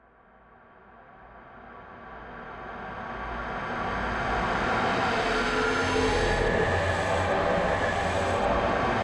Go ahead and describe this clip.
Layer of sample in reverse mod